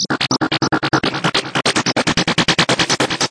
Psy Trance Loop 145 Bpm 09
The loop is made in fl studio a long time ago
goa, psytrance